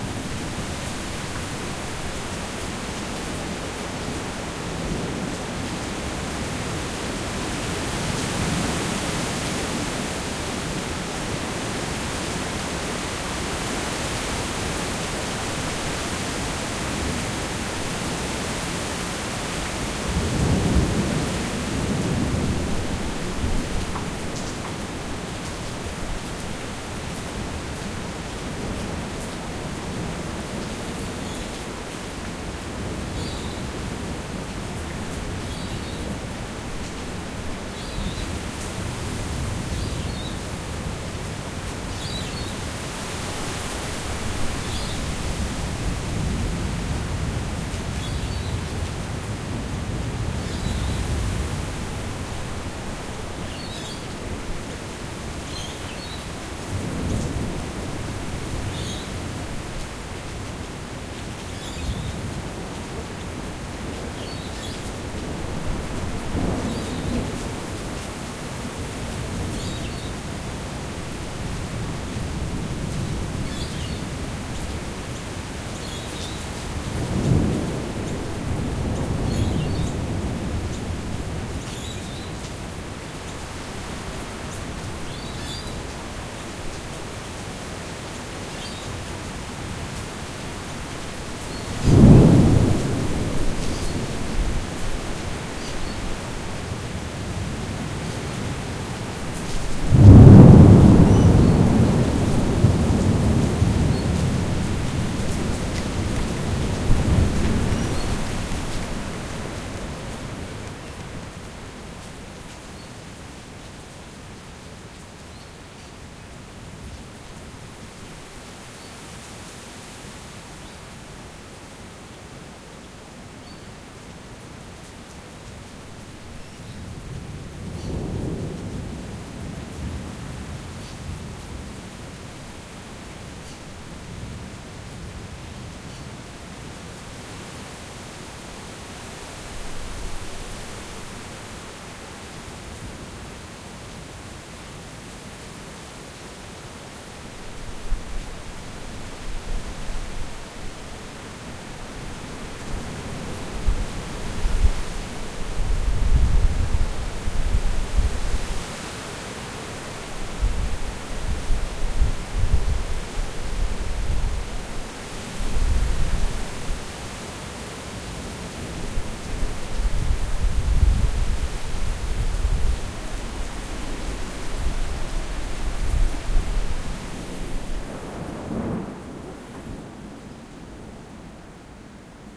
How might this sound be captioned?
Storm from balcony
Field recording of severe storm in Australia. Torrential rain, wind, thunder from sheet lightning. Rainbow lorikeets shelter nearby and call to each other. Recorded from balcony using Zoom H4n Pro
balcony, birds, lightning, rain, storm, thunder, thunder-storm, thunderstorm, weather, wind